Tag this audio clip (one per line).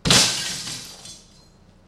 glass
loud
window